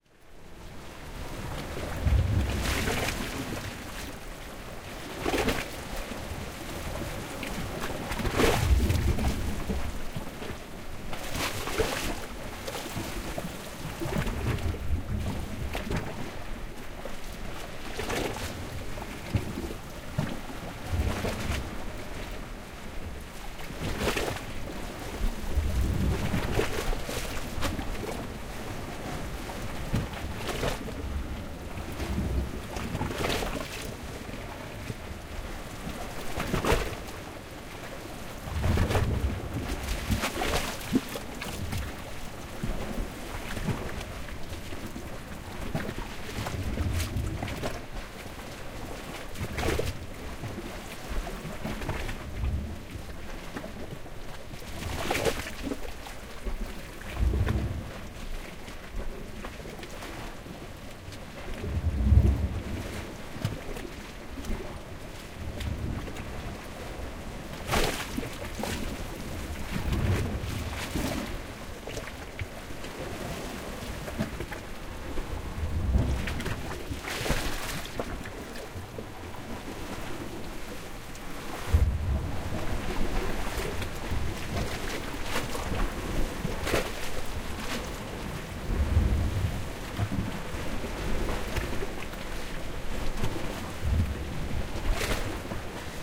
Sea (swirl)
Field-recording, Sea, Swirl, Waves